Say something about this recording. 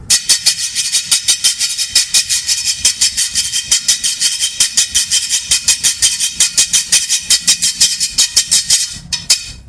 Charrasca de metal o macanilla
La charrasca es un instrumento de percusión menor que consiste en una caña de madera o un tubo de metal provisto de una serie de ranuras transversales sobre las que se desliza un palillo, produciendo un sonido vibrante.
Esta charrasca es tocada por Rafael Rondón en el marco de una entrevista que le realicé para mi blog.
"The charrasca is a minor percussion instrument consisting of a wooden reed or metal tube provided with a series of transverse grooves over which a stick slides, producing a vibrant sound.